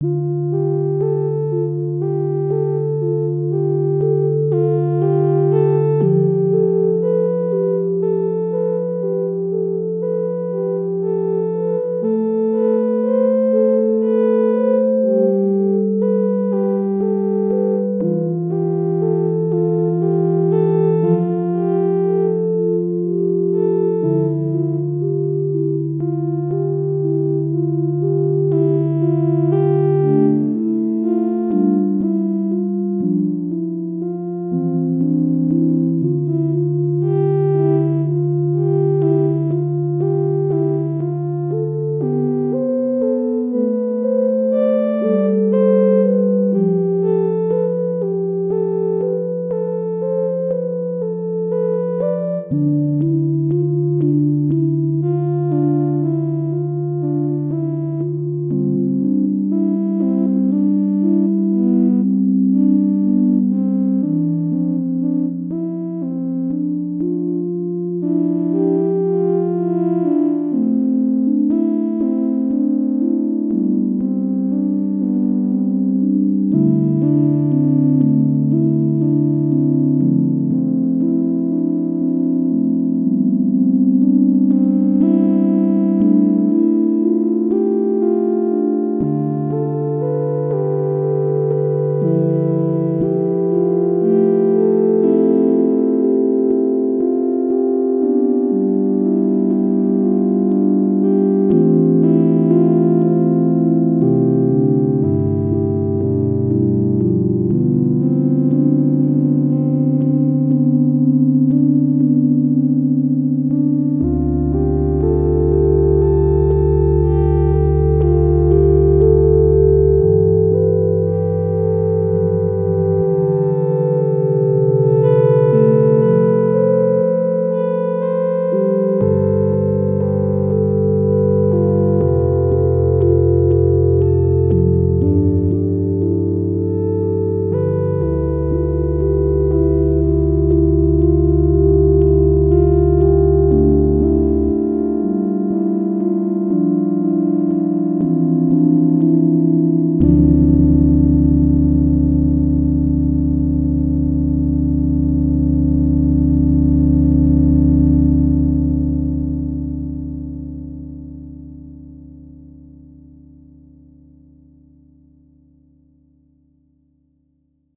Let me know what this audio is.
Melodic sequence with various automation using Helm synth and Ardour.